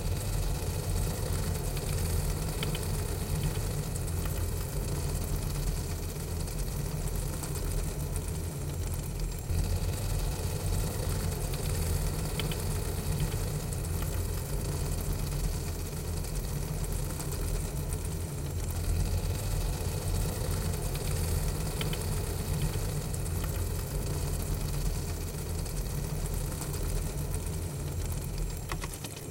I placed the Samson USB mic inside a plastic cake package and ran the water over it. Copy and pasted file into stereo and added some reverb I think.